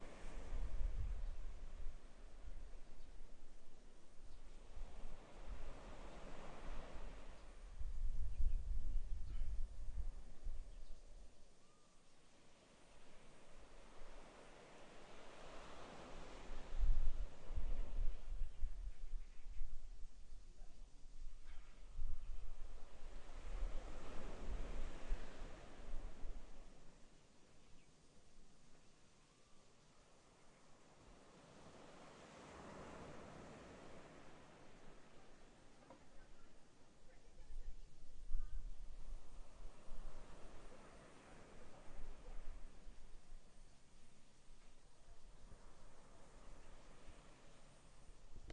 use my H6 recorder. XY Stereo. In Taiwan's some nature way.